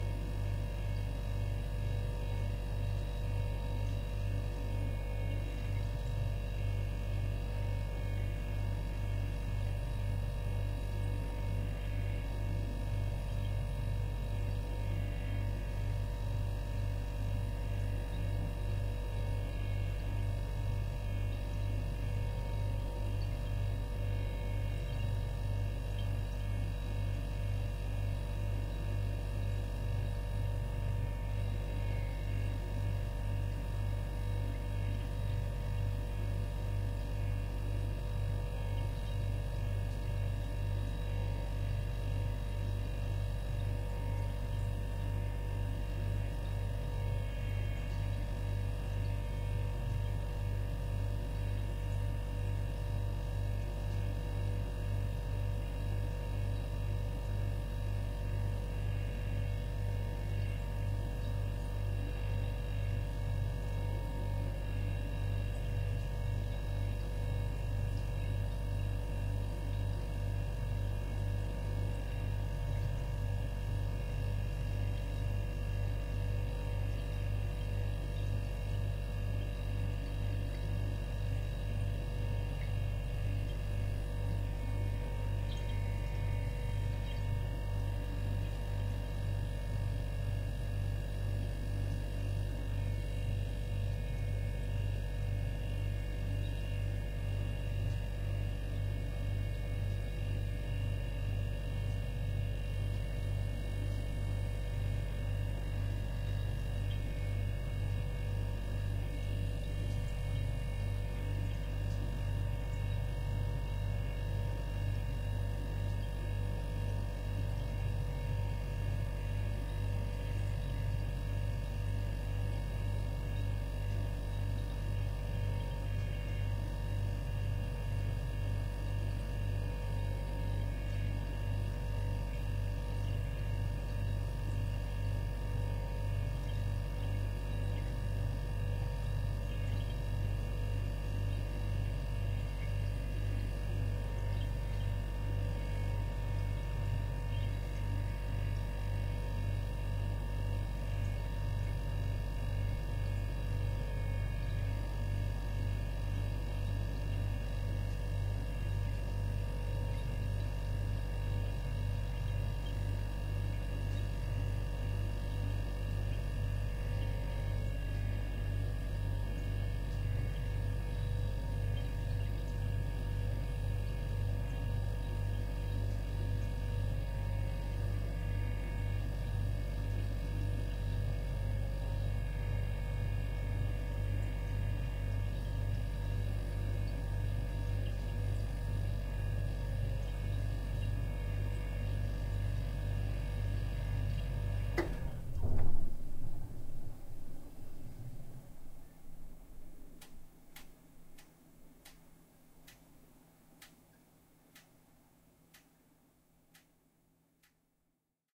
The sound of a refrigerator. Recorded with the Sony PCM D100.